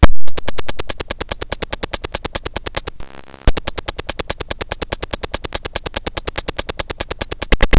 Instant IDM (intelletangent What)
just-plain-mental, murderbreak, experimental, coleco, bending, circuit-bent, rythmic-distortion, glitch, core